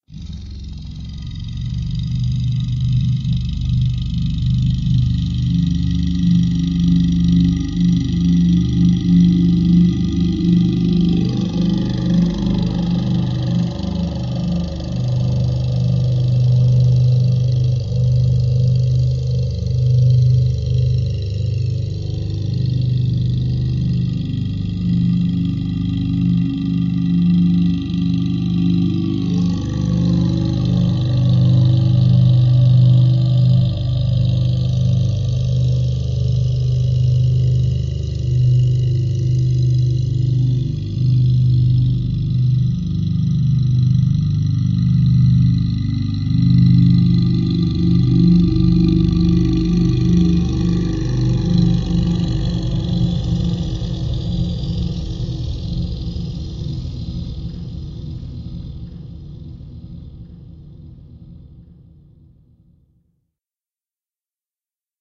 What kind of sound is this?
Tibetan Chant stretched
Tibetan chant played at half speed. This creates a very low pitched grating sound that can't be heard at normal speed. This is most likely a result of the phenomenon of throat singing which involves sustaining multiple pitches at once.